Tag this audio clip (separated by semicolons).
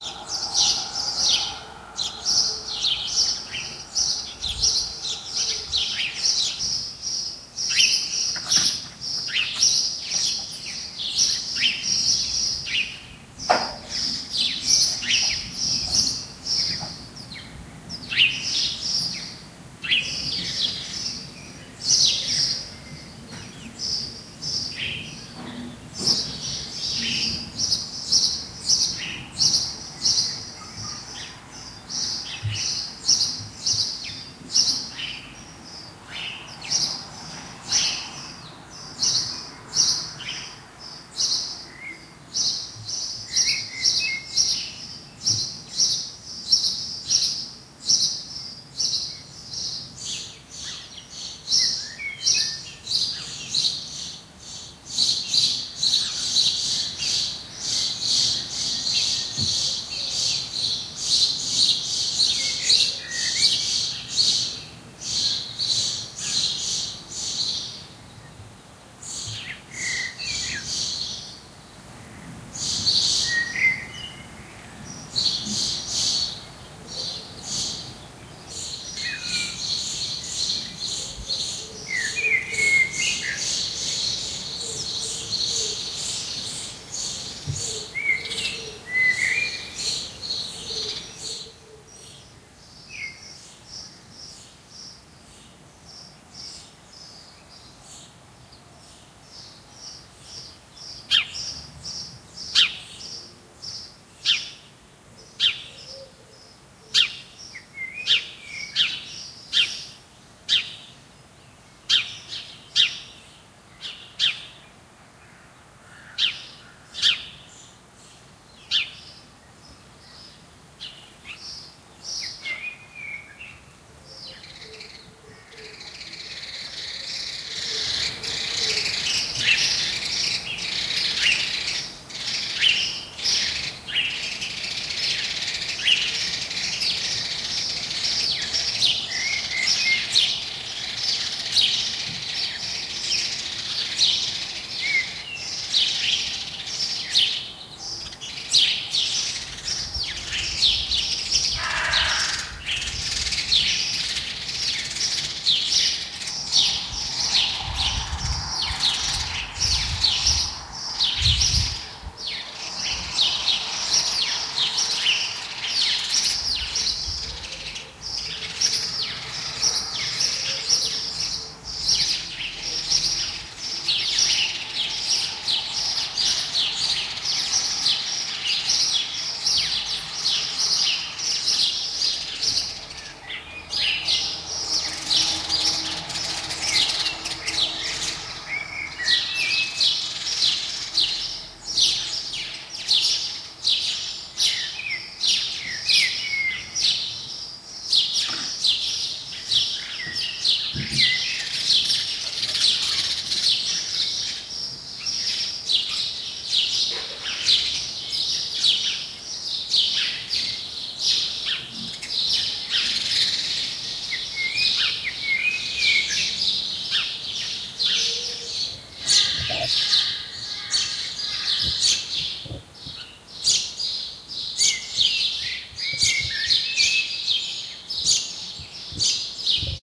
daytime; nature-ambience; Europe